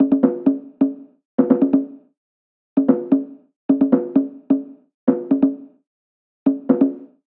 Congas Loop 130BPM
Sample from my latest free sample pack. Contains over 420 techno samples. Usefull for any style of electronic music: House, EDM, Techno, Trance, Electro...
YOU CAN: Use this sound or your music, videos or anywhere you want without crediting me and monetize your work.
YOU CAN'T: Sell them in any way shape or form.
dance, drop, sample, fx, electro, rave, edm, effect